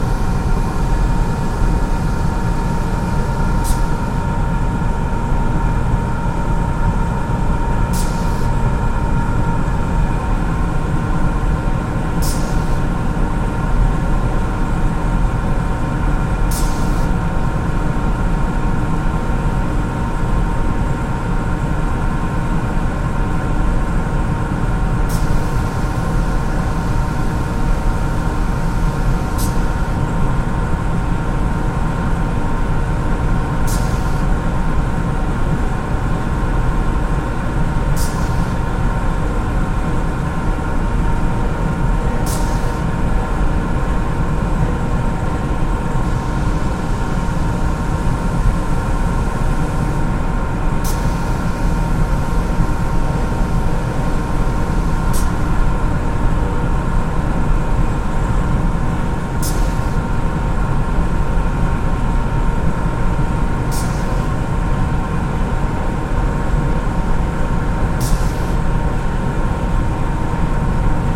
Sawmill Ambience 2
Field recording outside a sawmill building.